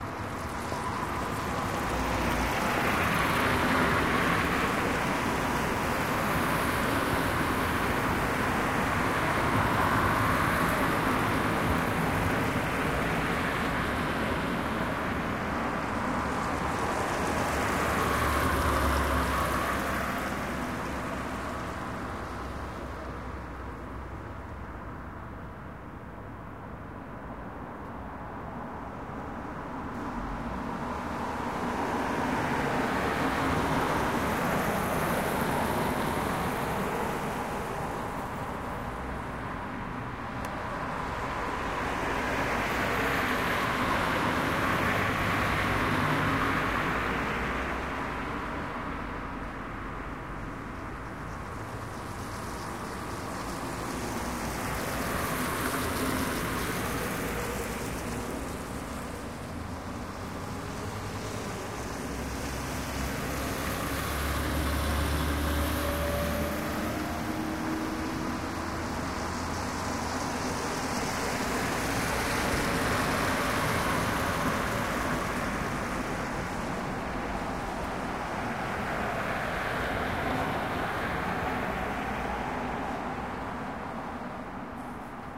evening street
car traffic on the street, in evening. a little bit of noises of steps under the window.